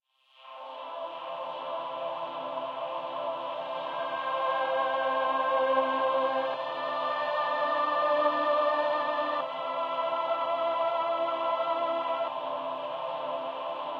choir main theme
soundscape, riff